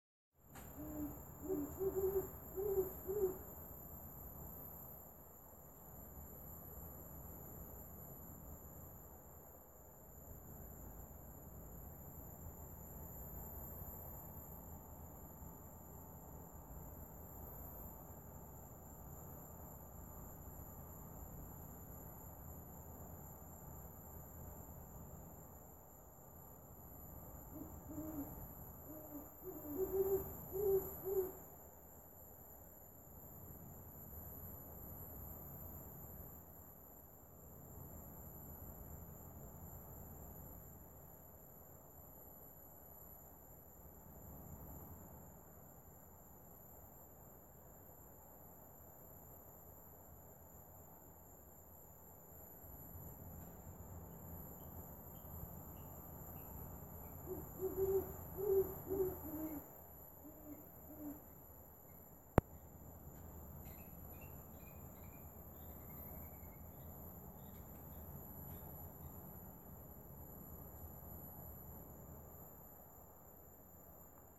A Great Horned Owl hooting in a Florida backyard
bird, owls, field-recording, birds, night, hoot, hooting, owl